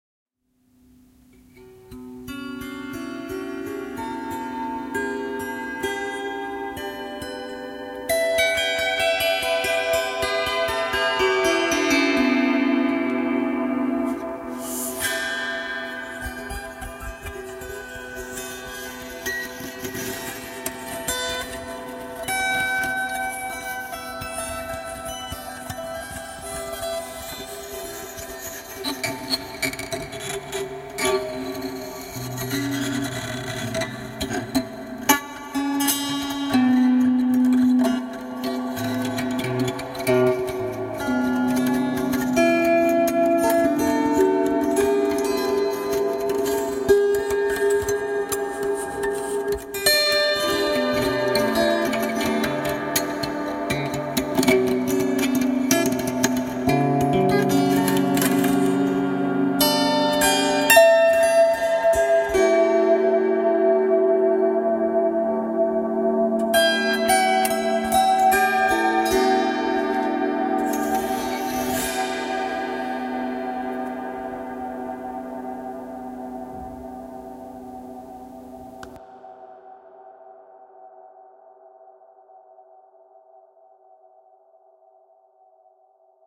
jam with my zither